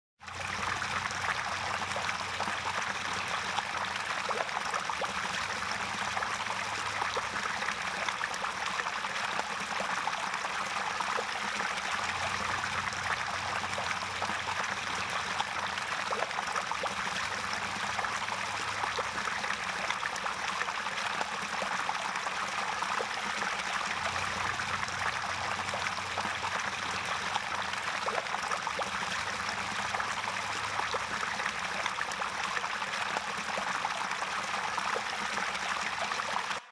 Stream Brook Water Flow 16 Bit
Recorded with a Portable Alesis Digital Recorder then widened and lightly manipulated in DAW. This is actual foley of a stream recorded at close range. If you use I'd love to see how you used it. Works great as a nice environmental sound for film work if laid softly in the background, if it's called for that is.
babbling, film, filmmaker, flow, flowing, gurgle, relaxing, river, splash, stream, trickle, water